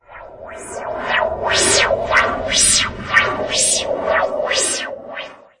Space Beam, Cloak, Warp, Jump, etc
Possibly a warp/jump/cloaking effect.
beam, cloak, cloaking, electronic, game, jump, laser, sci-fi, ship, space, spaceship, warp